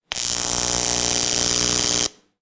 Electric Shock 5 Full
Electric shock generated sound
abstract, effect, electric, experimental, fx, generated, sci-fi, sfx, shock, sound-design, sound-effect, soundeffect